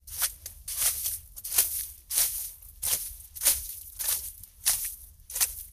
Close recording of walking through thin covering of melted / melting snow. Sort of loopable. Voice Recorder Pro with Samsung Galaxy S8 internal mics > Adobe Audition.